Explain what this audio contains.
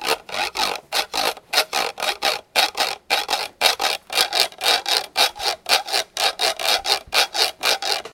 Mysounds HCP Dolia alarmclock
This is one of the sounds producted by our class with objects of everyday life.
France, Mysounds, Pac, Theciyrings